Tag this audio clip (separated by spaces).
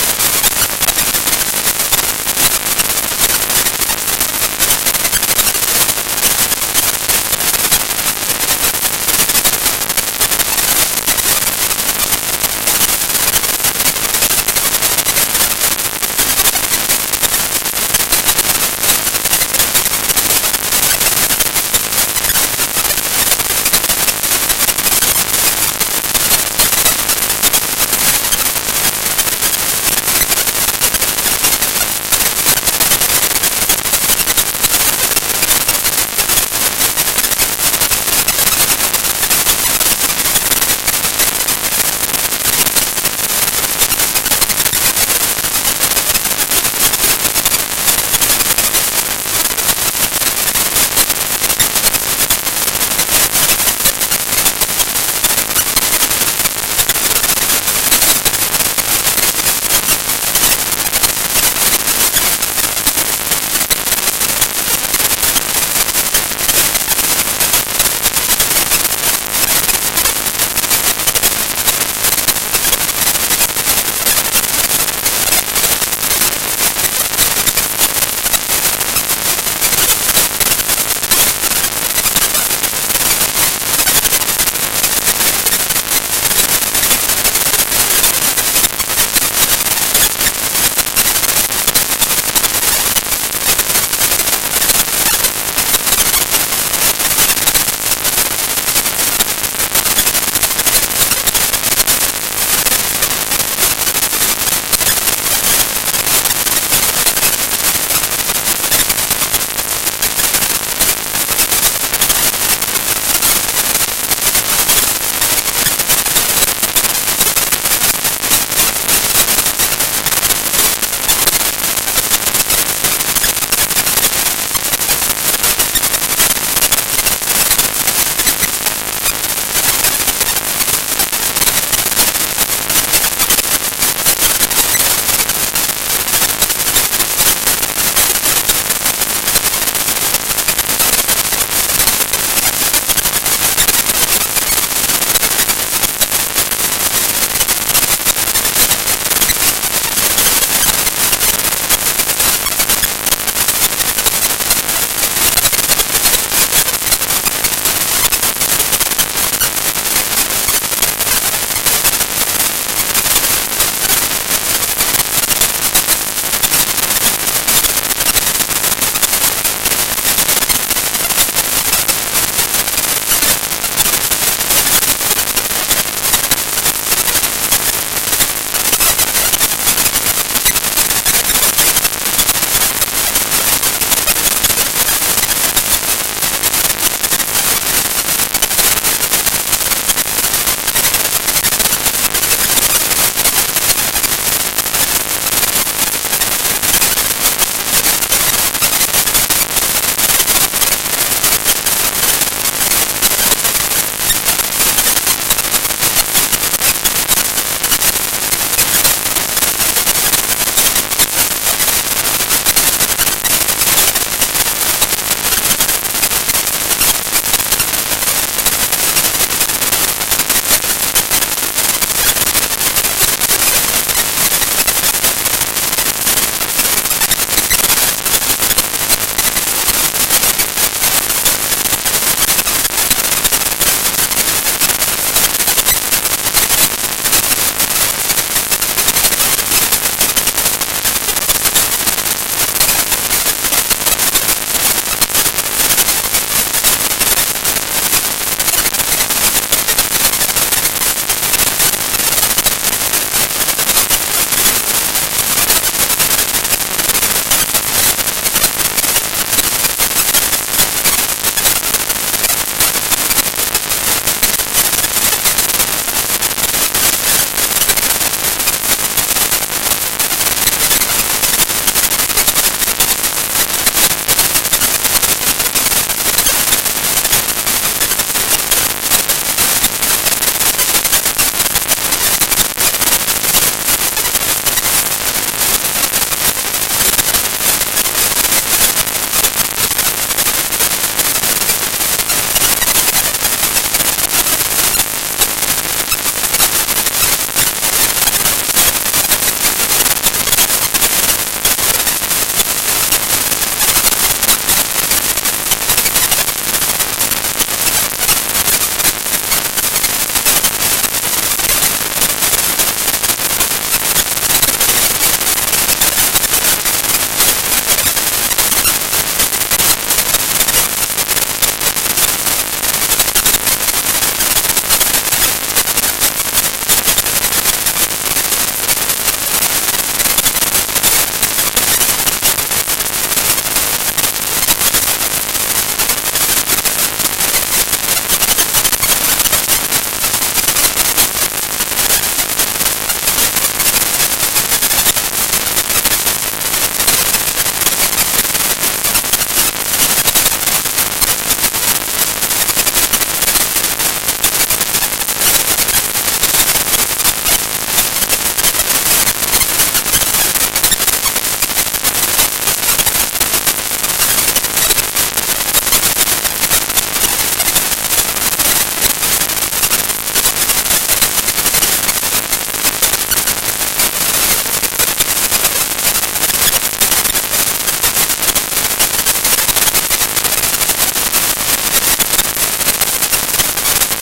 3 nordlead